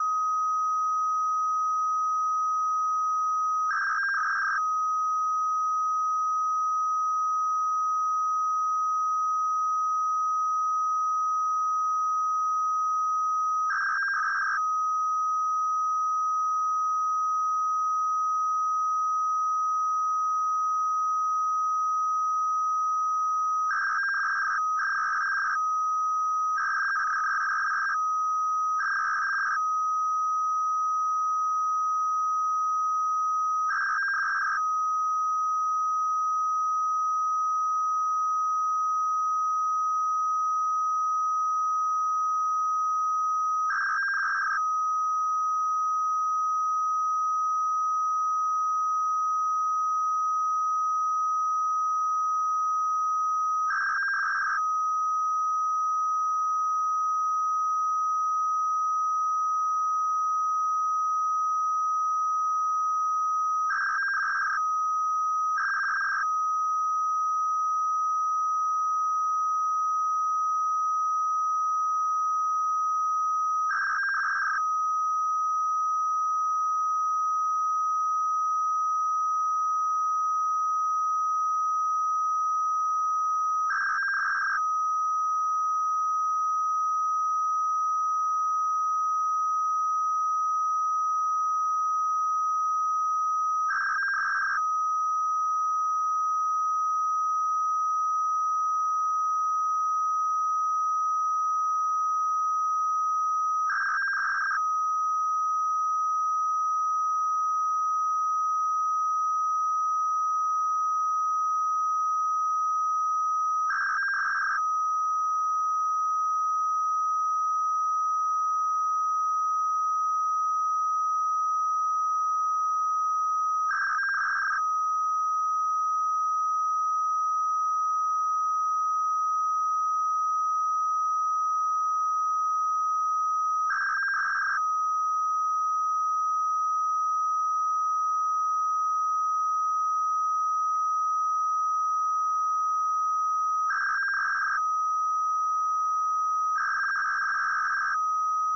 SDR 2014-09-04 127KHz periodic Bleepedibloops
This is one of multiple samples I have recorded from short wave radio, and should, if I uploaded them properly be located in a pack of more radio samples.
How the name is built up:
SDR %YYYY-MM-DD%_%FREQUENCY% %DESCRIPTION% (unfortunately I didn't get to put in the decimals of the frequency when I exported the samples T_T)
I love you if you give me some credit, but it's not a must.
SDR, radio